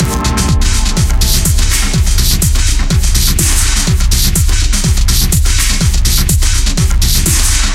Turgid, funky machine loop with confused bottom end.
bass, 124bpm, beat, loop, dark, techno, dance, 124